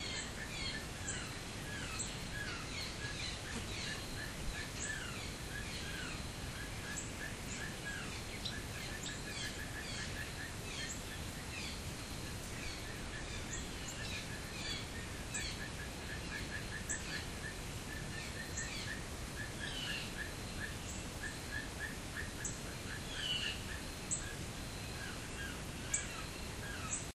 blue jays challenge
A few Blue Jays in the same tree challenging each other. A lot of folks have never heard them make this sound.
birds, blue, fighting, nature, jay